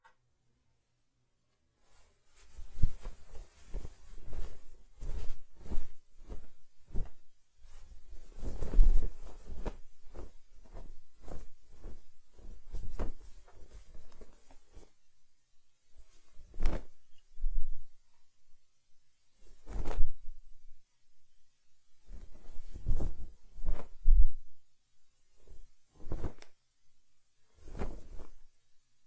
Blanket noises d7s(2)
Heavy Blanket getting Moved Around
Blanket, Heavy, Large